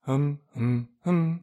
a variation of short hums